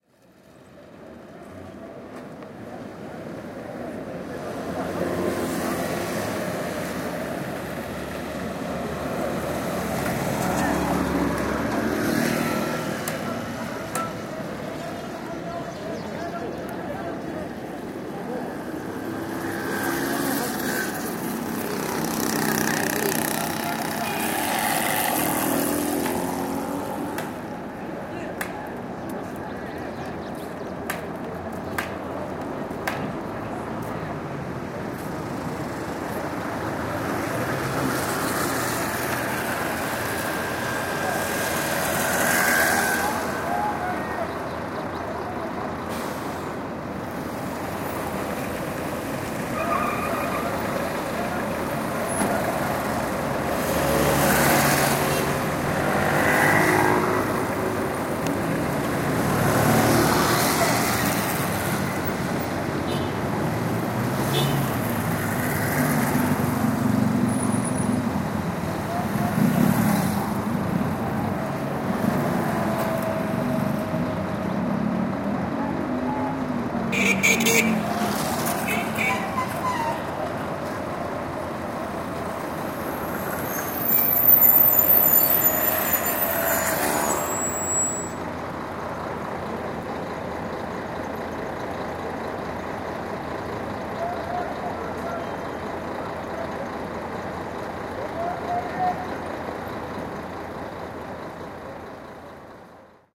This recording was made in Medina, Marrakesh in February 2014.